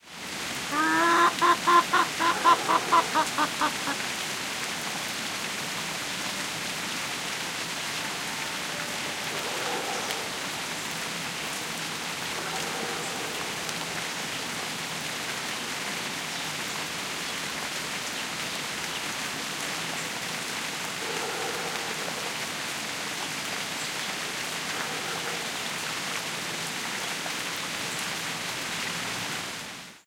Hen clucking while rain falls on orange trees. In background, barkings from a distant dog. Primo EM172 capsules into FEL Microphone Amplifier BMA2, PCM-M10 recorder.